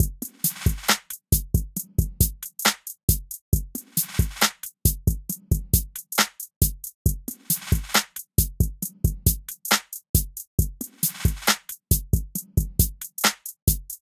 Made on FL studio 10
samples taken from "Producers Kit" downloaded separately.
Written and Produced by: Lord Mastereo
Keep it chaste!
1love_NLW